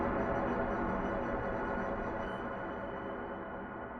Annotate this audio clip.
Industrial Strings Loop Noise 02
Some industrial and metallic string-inspired sounds made with Tension from Live.
dark-ambient, industrial, metallic, strings